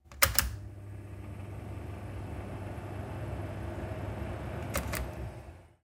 Oven on off
bake, oven, house, baking, off, oven-running, cook, heat, cooking, noodle, household